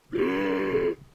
Monster Bellow 3
bellow, monster